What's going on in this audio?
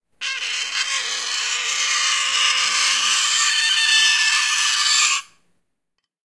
Fork scraping metal 1
Fork scraping metal sound, like nails scraping sound